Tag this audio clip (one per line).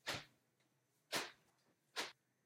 animation
swoosh
transition
whoose
whoosh